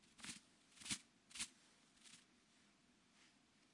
attempt to make the sound of a small bird cleaning itself on a branch, short burst